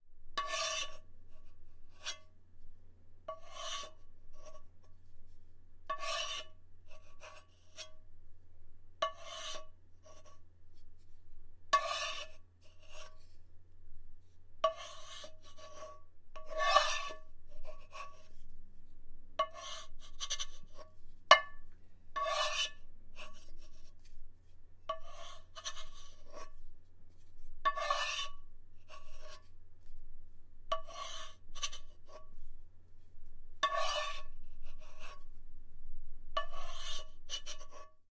Wooden spatula running around the interior of a frying pan. Reenacting a spatula scooping or moving object in frying pan.